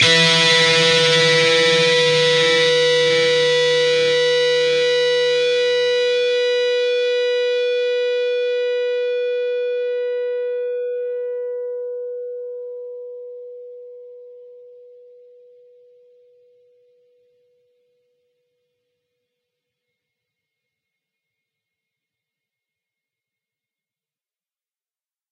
Fretted 12th fret on both the B (2nd) string and the E (1st) string. Up strum.